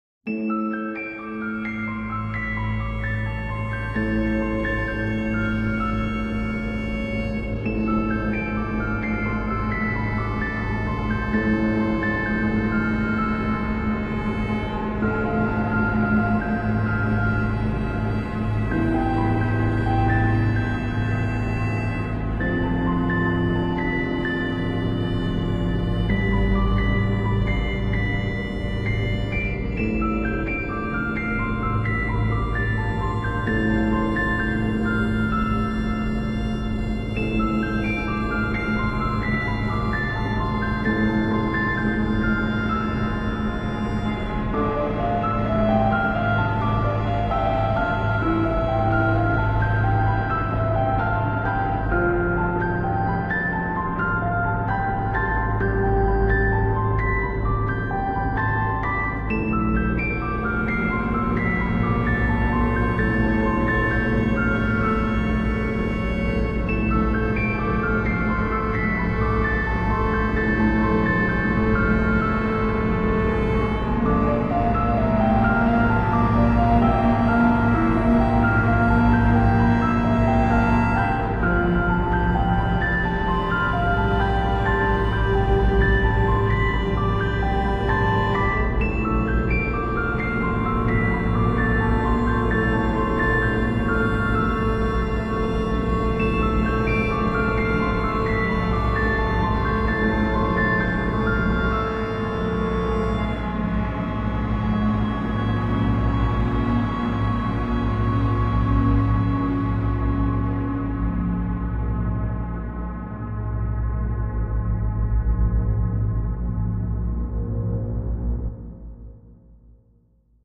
Atmospheric Piano & Violin Music 01
Emotional, Orchestral, Beautiful, Soundtrack, Atmosphere, Dramatic, Atmospheric, Theme, Violin